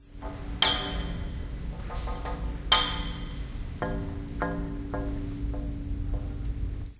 Exploration of the handrail eco, that keeps up with the stairs. Sound produced with the touch of the finger touching with different duration and speed in the handrail.
handrail, public-space, field-recording